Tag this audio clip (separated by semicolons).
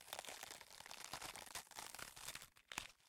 blood; flesh; intestines